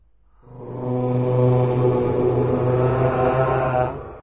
creepy moan
whispers
Recorded with AV Voice Changer Software